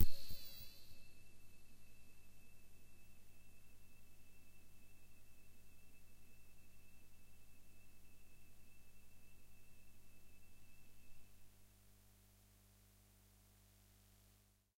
This is a sample from my Q Rack hardware synth. It is part of the "Q multi 011: PadBell" sample pack. The sound is on the key in the name of the file. A soft pad with an initial bell sound to start with.
waldorf, pad, multi-sample, bell, bellpad, electronic, synth